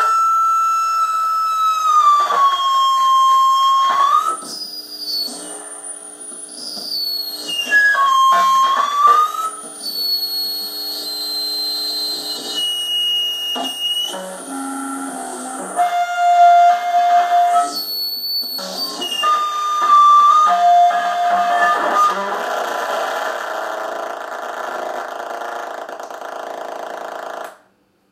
Electric guitar feedback. The signal is being routed from one amp into a small miniamp.